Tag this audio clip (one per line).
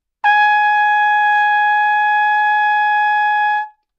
trumpet
single-note
multisample
Gsharp5
good-sounds
neumann-U87